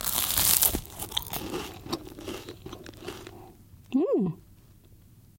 eating a croissant 02
Biting into a fresh baked croissant, chewing and then mmh...
croissant, crispy, chewing, Eating